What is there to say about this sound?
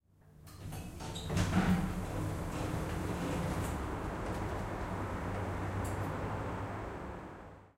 elevator door open 7b
The sound of a typical elevator door opening. Recorded at the Queensland Conservatorium with the Zoom H6 XY module.
closing, mechanical, elevator, door, sliding, open